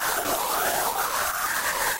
Created with audiopaint from original and manipulated bmp files to compare with coagula. It seems coagula has much more image editing features but audiopaint gives more control over how sound is generated... to be continued.
synth, image, space